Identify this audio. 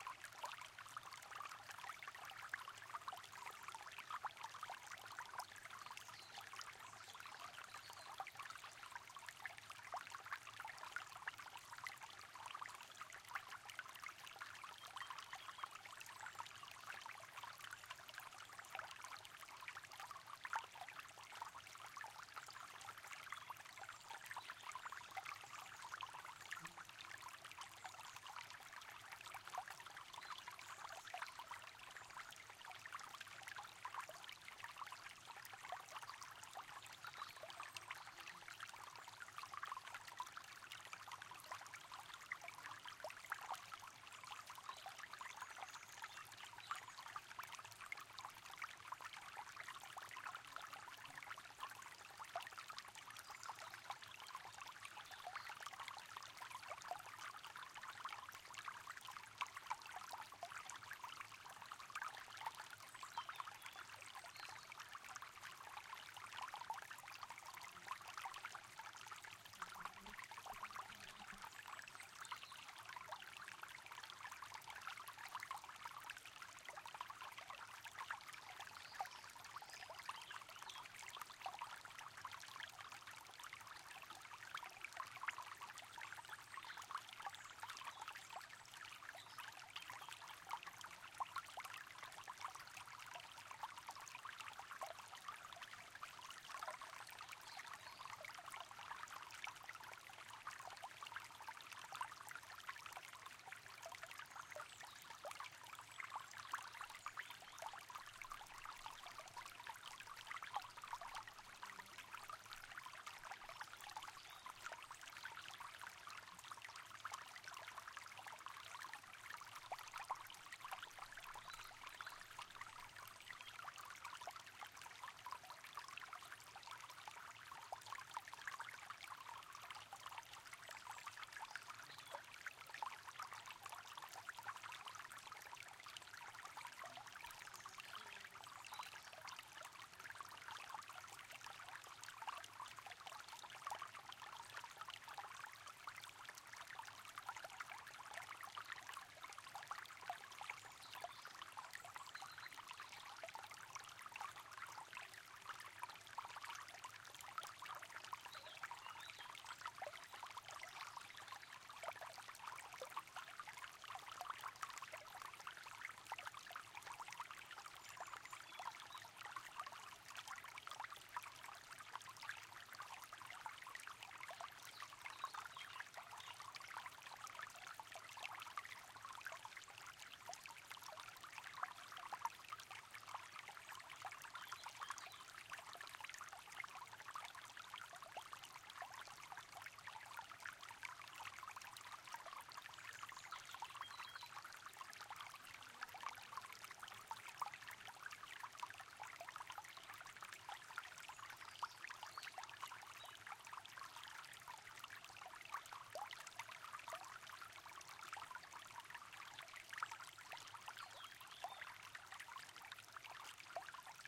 Recorded in Sardinia, Olzai.
A river that flowing surrounded by greenery between small rocks. The file includes the sound of the wind, birds, insects and grazing animals.
Lastly, if you appreciate my work and want to support me, you can do it here:
Buy Me A Coffee
ambiance, ambience, ambient, bird, birds, birdsong, brook, creek, field-recording, flow, flowing, forest, liquid, nature, relaxing, river, sardinia, spring, stream, trickle, water, wild, woods